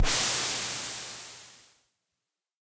EH CRASH DRUM99
electro harmonix crash drum
crash, electro